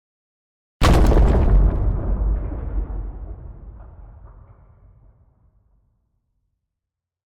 Explosion, Impact, Break gravel, reverb
Channel : Mono